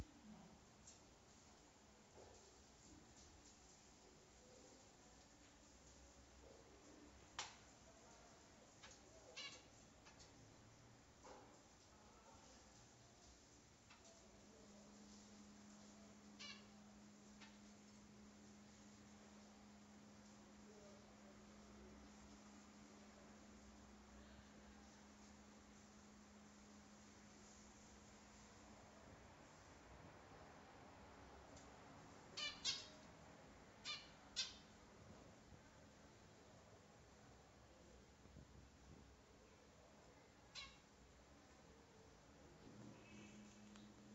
1-sonido-ambiente
This is a foley of an exterior background sound, this foley is for a college project.